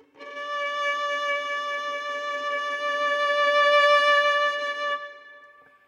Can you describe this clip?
This high-quality sound effect captures the haunting and evocative sound of a violin single note swell. The recording is perfect for sound designers, filmmakers, and content creators looking to add emotional impact to their project. The sound of the violin is captured with precision and depth, with the swell adding a sense of tension and release. This sound effect is versatile and can be used in a wide variety of contexts, from dramatic film scenes to meditation music. Add this powerful and emotive sound effect to your library today.
Atmosphere, Crescendo, Drama, Effect, Emotive, Evocative, Film, Haunting, Instrument, long, Meditation, Melodic, note, Release, Score, Solo, Sound, Sustain, swell, Tension, tone, Vibrato, violin